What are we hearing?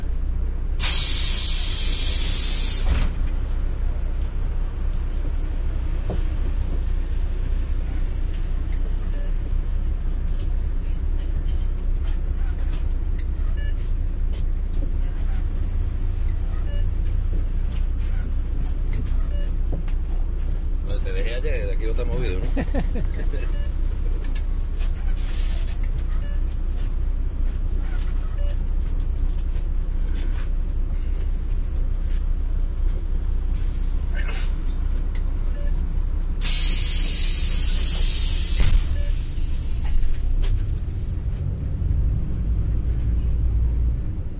recorded inside a bus. You can hear the door opening, the ticket
machine, a guy that tells a joke (in Spanish), me laughing, doors
closing, and the bus that starts to move
Soundman OKM into Sony MZN10 minidisc